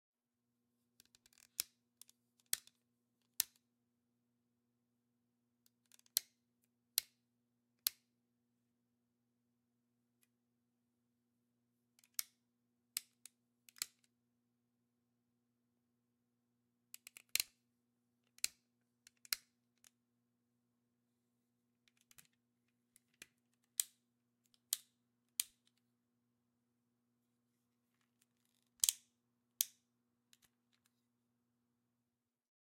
Toy crackle
The sound of a toy´s arm moving. Also useful for machines.
Toy, Crackle, Machine